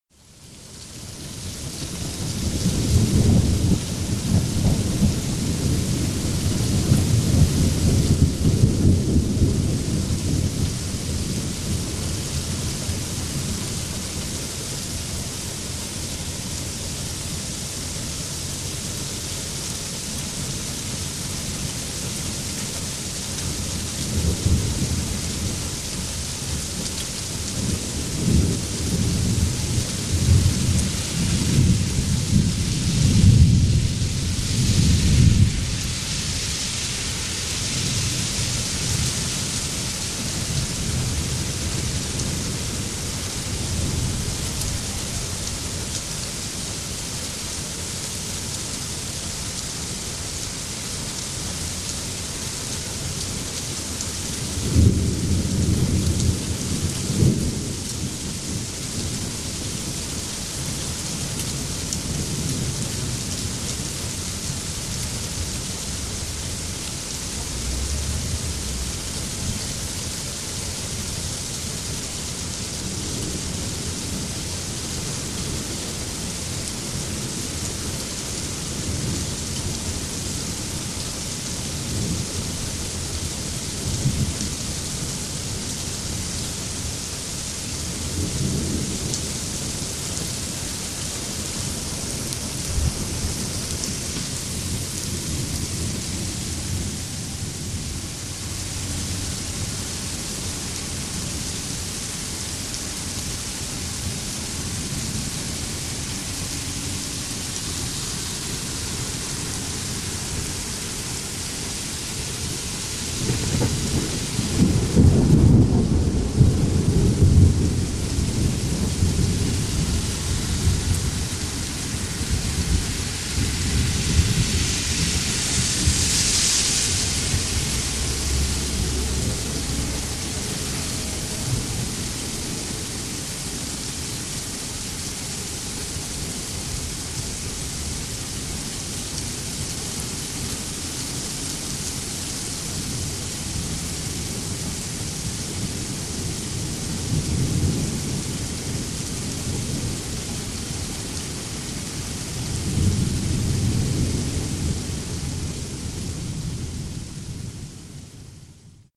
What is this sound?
Rainy night
I didn't notice many good rain sounds on this site so I decided to leave my mic recording beside my window :P it's pretty short but enjoy
Recorded with Sony HDR PJ260V then edited with Audacity
ambient, sound, shower, low, bassy, deep, thunder, ambiance, rumble, ambiant, rain, ambience, atmosphere, rolling